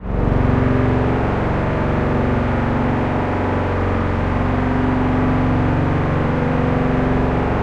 STRINGY-4791-2mx2PR F#-1 SW
37 Samples Multisampled in minor 3rds, C-1 to C8, keyboard mapping in sample file, made with multiple Reason Subtractor and Thor soft synths, multiple takes layered, eq'd and mixed in Logic, looped in Keymap Pro 5 using Penrose algorithm. More complex and organic than cheesy 2 VCO synth strings.
Multisample, Synth, Strings